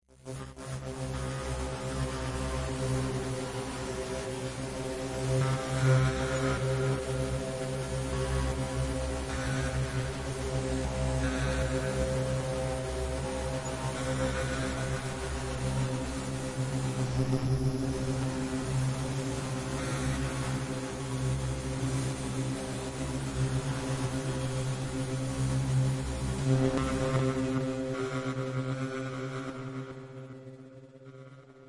robot ghost
Spooky robot talk